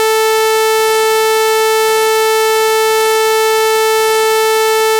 ob sawtooth
sawtooth generated waveform of frequency 440 Hz length = 5 seconds. Generated with Audacity